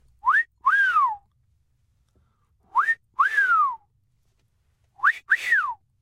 Wolf Whistle
A simple wolf-whistle